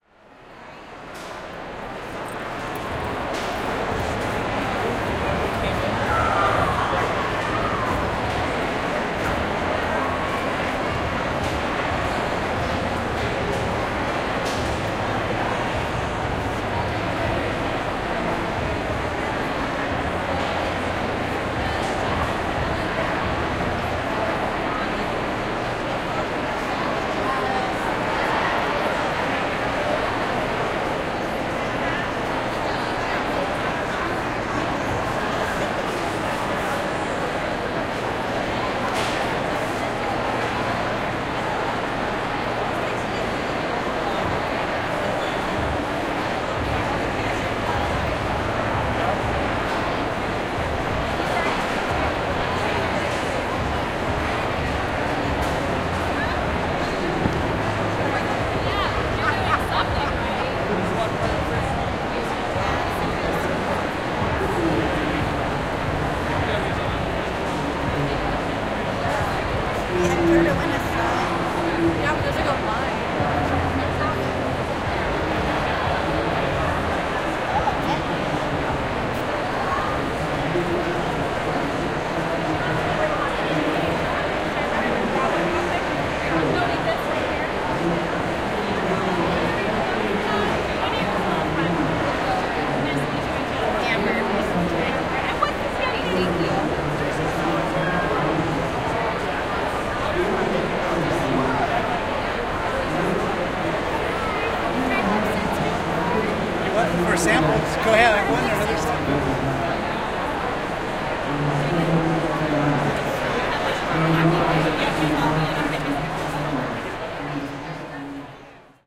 I went to an event at the OC Fair in Orange County and had my Zoom H6 with me and made this fantastic Walla (Wall of People) recordings!
In this version I used the Hass Effect raised the gain and "killed the mic rumble"
Have fun with the sound!